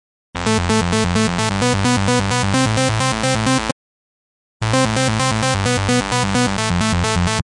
This sound was made using Thor in Reason. It is an analog, wave-table and multi Oscillator. It also has 2 step C Major chord arpeggiation. Good for edm style music.